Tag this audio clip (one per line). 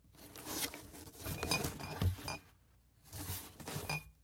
Bottles
glass-tinging